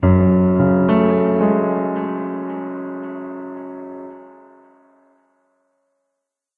Mellow, minor piano phrase, part of Piano moods pack.